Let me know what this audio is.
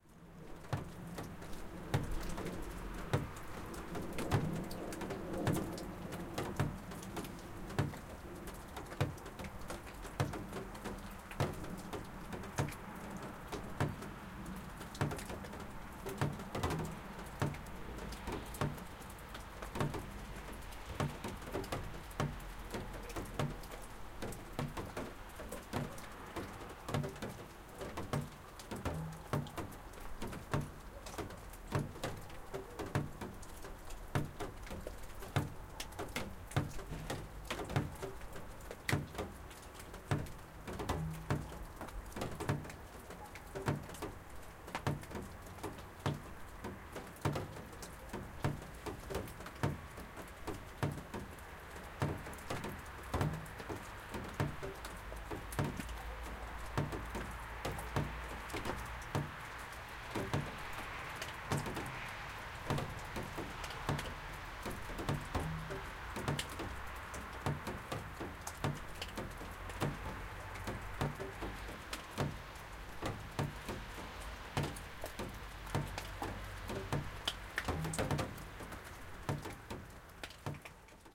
23.12.11: about 4 p.m. sound of drops dripping in the canopy of the dumping ground. the canopy is made with
corrugated plastic. in the background sound of passing by cars. Gen. Bema street in Sobieszow (south-west Poland).
recorder: zoom h4n
fade in/out only
dropping, trickle, fieldrecording, water, pour, drizzle, drops